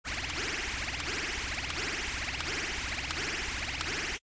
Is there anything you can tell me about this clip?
granular changecounter
Changecounter sound ran through granulab.
granular, jillys, loop, synthesis